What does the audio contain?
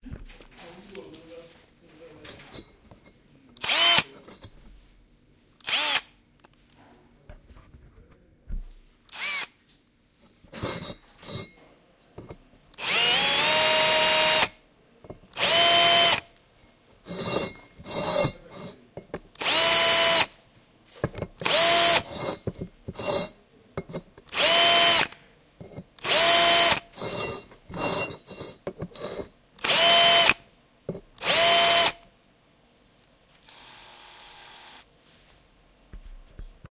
Electric Screwdriver Drilling Metal
Finishing drilled holes with electric screwdriver
Please check up my commercial portfolio.
Your visits and listens will cheer me up!
Thank you.
drill, drilling, electric, electronic, field-recordings, hole, metal, screwdriver, tools, workshop